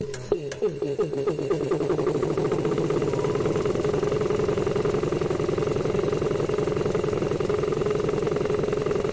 Compressor start loop 1
the starting of a small compressor motor- with a looped section